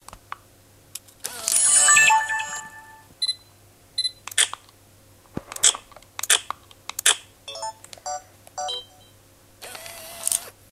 Camera beeps & noises
A sound effect of a various camera beeps on a digital camera
beep, button, camera, click, digital, electronic, glitch, noise